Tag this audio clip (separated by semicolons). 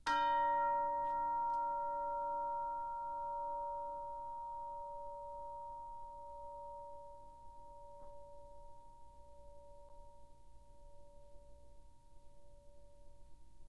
bells; chimes; decca-tree; music; orchestra; sample